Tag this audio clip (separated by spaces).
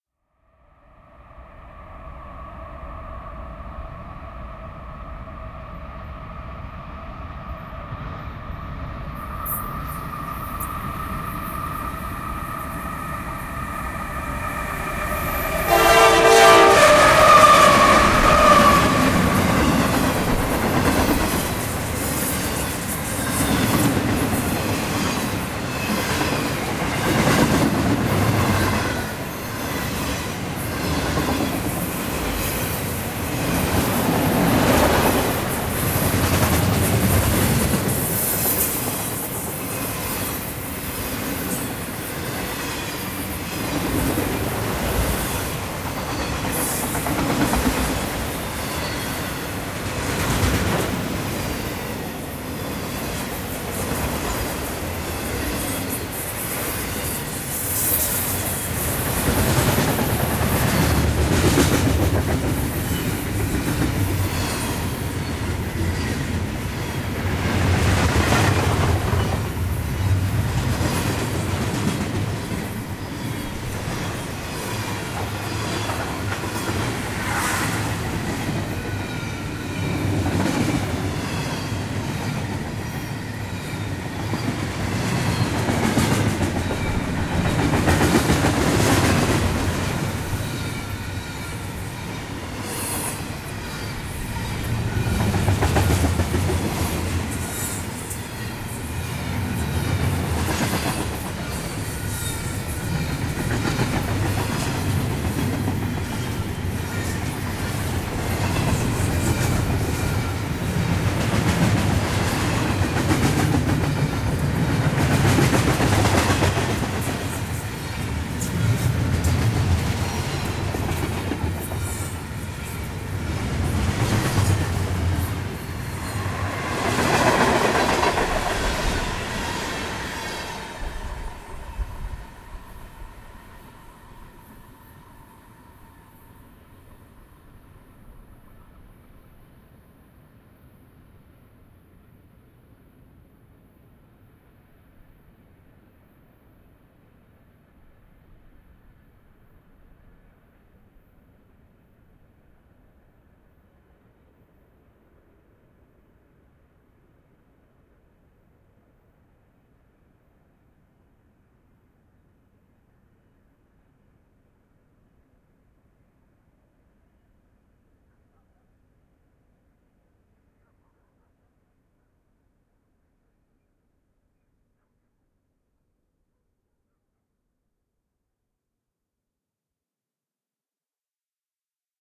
desert desierto field-recording mexico san-lui-potosi train tren wadley